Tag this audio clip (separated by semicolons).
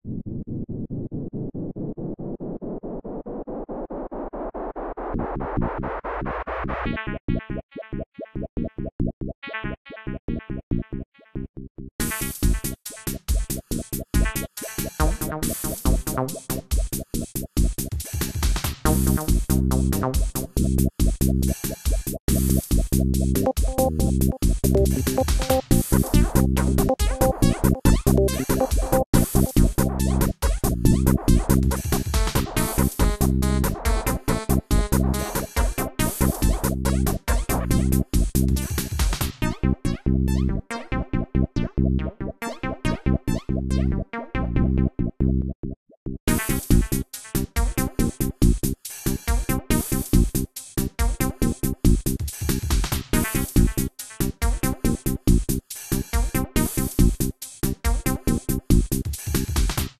effect; fx; phase; sound; soundboard; sound-effect